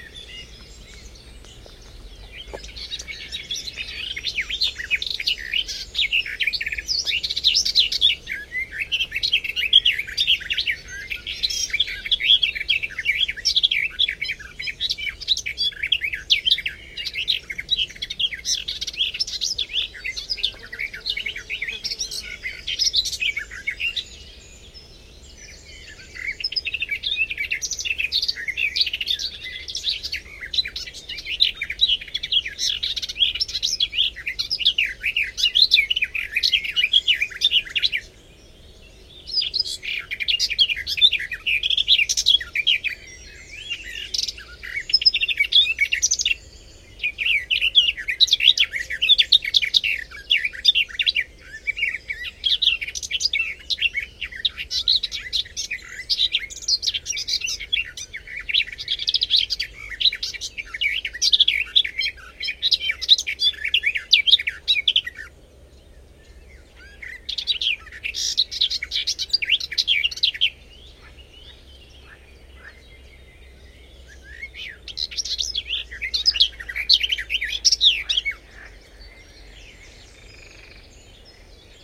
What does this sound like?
2012 5 24 garden warbler
Long song of a Garden warbler (Sylvia borin) singing in spring time at the edge of a swamp ares near Dusseldorf, Germany. Vivanco EM35 over preamp into Marantz PMD 671.